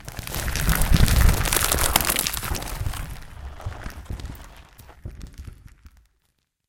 You may want to speed it up some depending on how fast this rocks are crumbling!
dirt, crumbling, rocks, falling, stone, stones, rubble, pebbles, avalanche, boulders